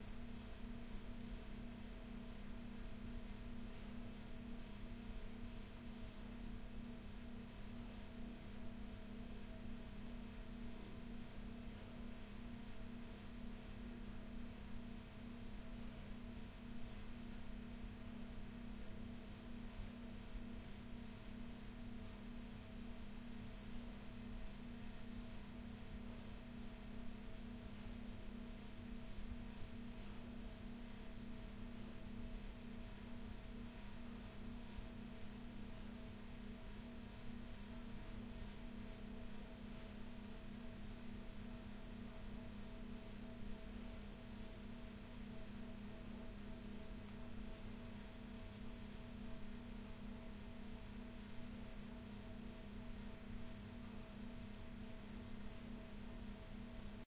The sound of air conditioning in a gallery space at the National Museum of Ireland - Decorative Arts & History, Collins Barracks, Dublin, Ireland.